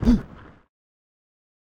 layering of grunt and running jump with a low pass filter to block out the sounds of leaves rustling underfoot
foot, game, grunt, human, jump, launch, male, man, voice